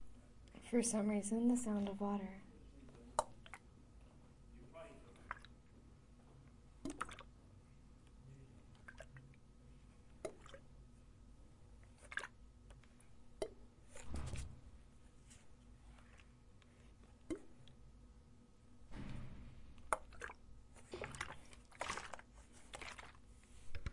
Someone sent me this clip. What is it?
water bottle splashes
bottle, splashes